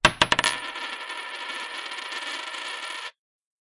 Coin Sound, Long, 02
Coin Sound
This sound can for example be used in films, games - you name it!
If you enjoyed the sound, please STAR, COMMENT, SPREAD THE WORD!🗣 It really helps!
app, coin, drop, dropped, money, payment, shop, sound, top-up, topup